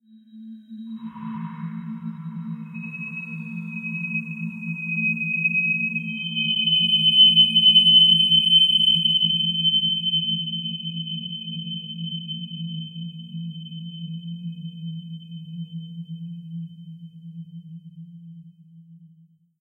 a synthetic whistle in the dark.
abstract, effect, electronic, sci-fi, sfx, sound-effect, soundeffect